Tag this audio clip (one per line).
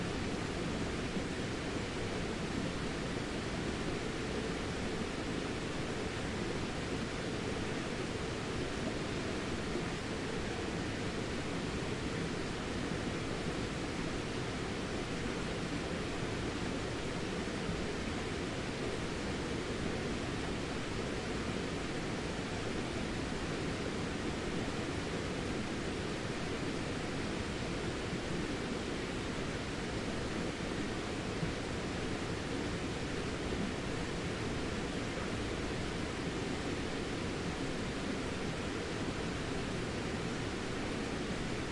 night
stream
nature
ambient
forest
quiet
peaceful
water
field-recording
Germany
Odenwald
surround